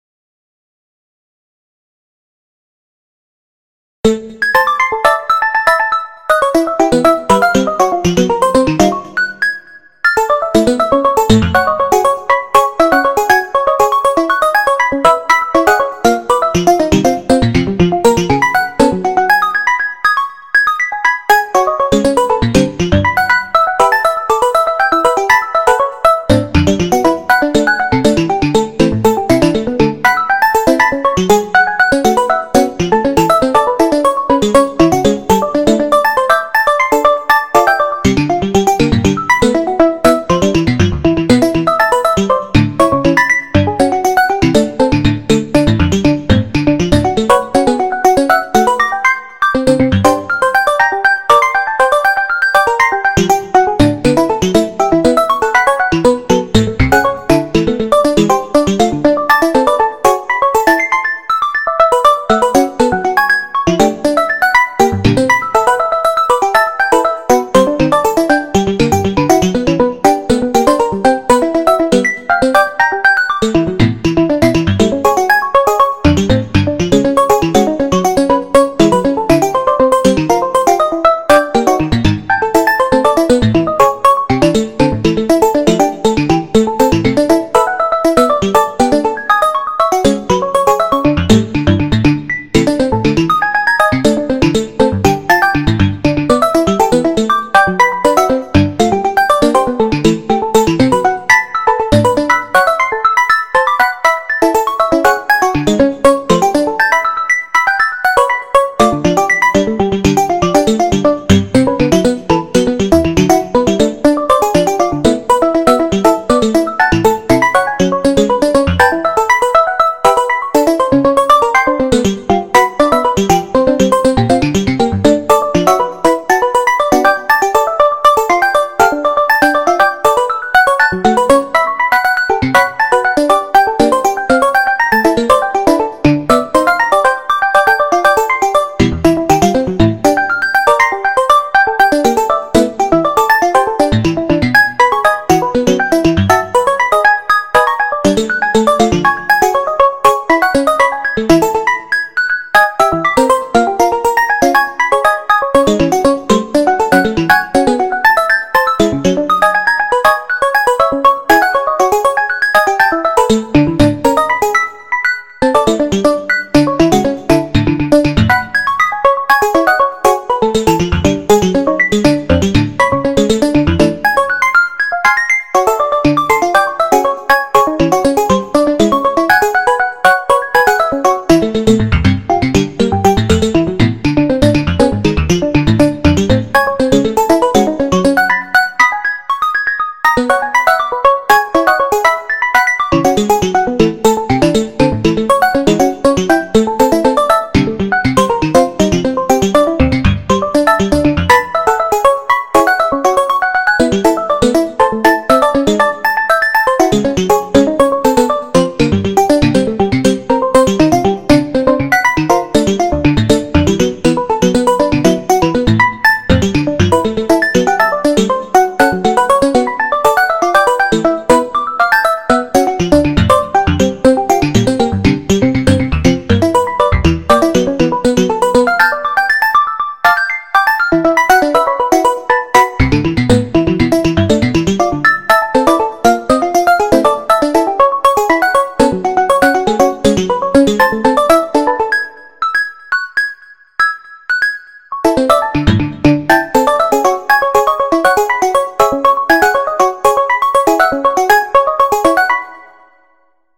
A guitar recorded and altered in Ableton.